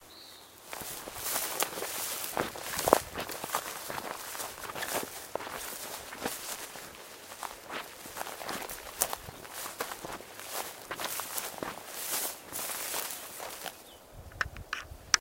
Walking in a forest medium
Walking leisurely yet determinedly in a grassy forest. Dead grass being crunched underfoot, and living green grass brushing against clothes. A few birds in the background, and wind gently blowing through the grass. A great piece of audio to add to a movie or a video.
footstep, footsteps, walk, steps, dead-grass, step, forest, feet, walking, foot, grass, ground